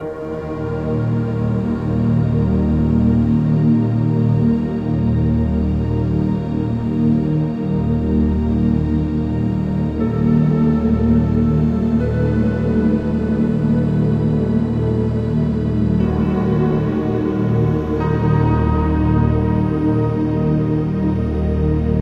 DARK HORROR SAMPLE 27.02.17 BY KRIS KLAVENES
hope u like it did it on keyboard on ableton :D
dramatic, scary, haunted, nightmare, music, creepy, horror, cinematic, freaky, strings, spooky, dark